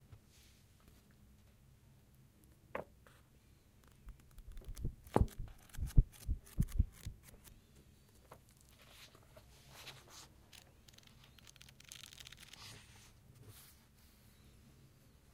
Moving paper rapidly